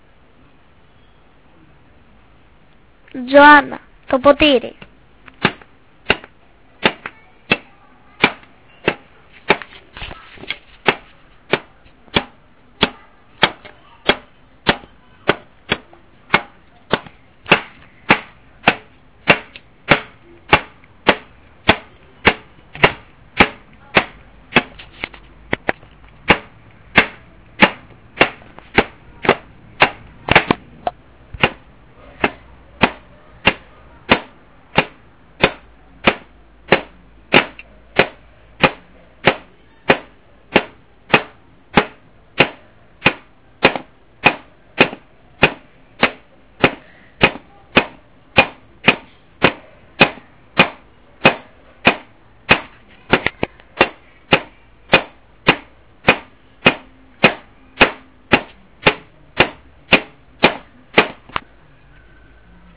Sonicsnaps-49GR-joana-potiri
Sonicsnaps made by the students at home.
Greece, sonicsnaps, glass